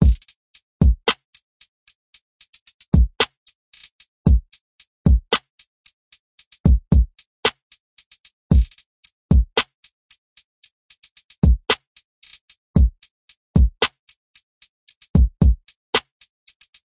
Hip-Hop Drum Loop - 167bpm
Hip-hop drum loop at 167bpm
beat, clap, drum, drum-loop, drums, hat, hi-hat, hip-hop, hip-hop-drums, hip-hop-loop, kick, loop, percussion, percussion-loop, rap, snare, trap